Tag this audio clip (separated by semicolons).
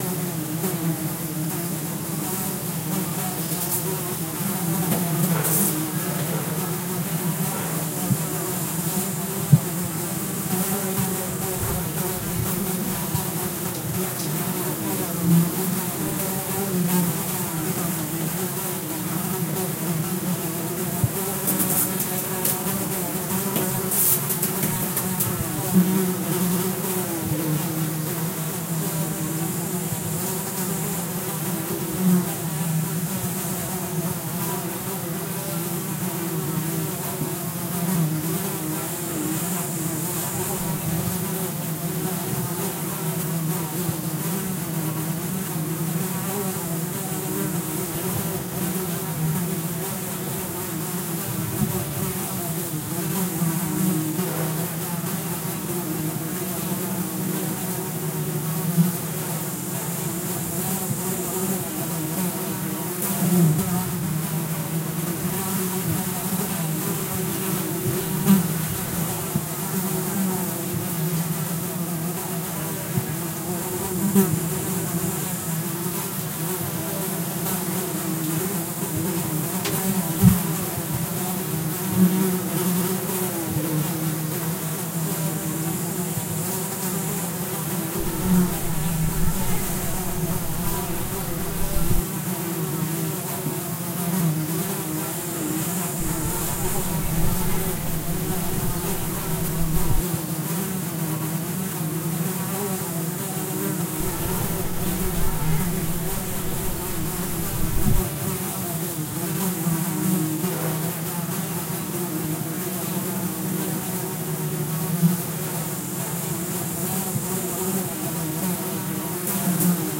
flies; summer